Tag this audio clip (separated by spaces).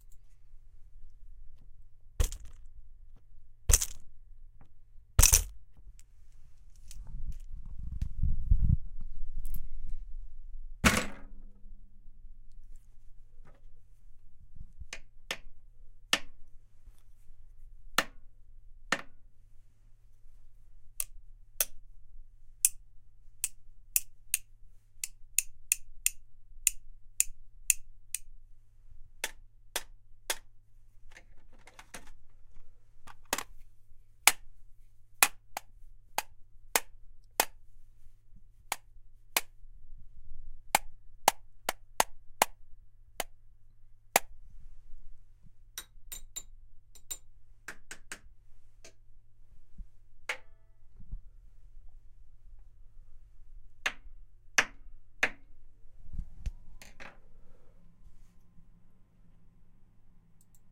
glass currency tools coins metallic tapping metal screwdriver foley thumps